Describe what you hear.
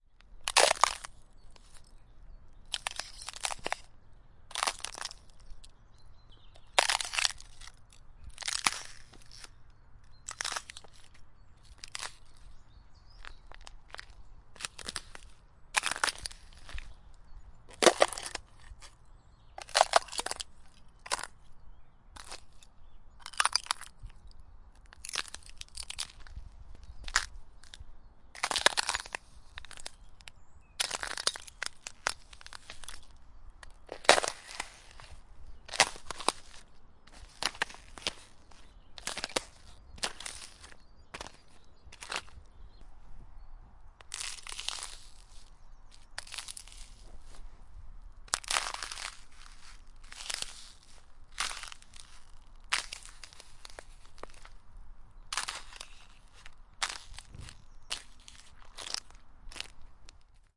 Footsteps, Ice, A
Raw audio of stepping in some frozen puddles.
An example of how you might credit is by putting this in the description/credits:
The sound was recorded using a "H1 Zoom recorder" on 18th February 2016.